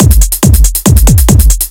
EDM TRAP BEAT 140
Come up with this 140 bpm 4x4 beat using LMMS and figured I would share it. Make sure to tag me if you use it.
140-tempo, Beat, Drum, Drums, EDM, Electronic, Kick, loop, Music, trap